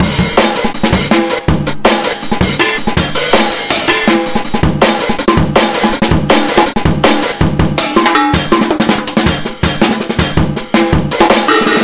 junk break
break made from random sounds like a drum and bass stomp.
4-bar, beat, break, dnb, drum, jungle, junk